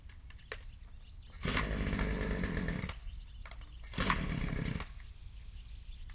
My Partner chainsaw won't start again. Two tries, and then silence. Recorded with a Sony HI-MD walkman MZ-NH1 minidisc recorder and two WM-61A Panasonic microphones